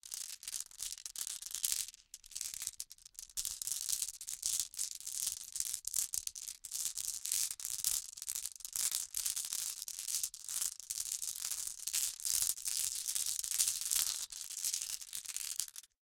Glass marbles being shuffled around in cupped hands. Dry, brittle, snappy, glassy sound. Close miked with Rode NT-5s in X-Y configuration. Trimmed, DC removed, and normalized to -6 dB.
glass, hand, marble, shuffle